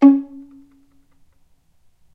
violin pizz vib C#3
violin pizzicato vibrato